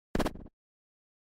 Wave Fast
Edited, Free, Mastered